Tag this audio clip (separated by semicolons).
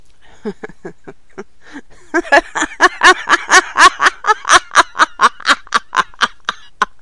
laughing; female; laugh; giggle; woman; laughter